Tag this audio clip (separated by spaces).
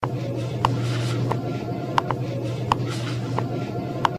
field-recording grocery-store processed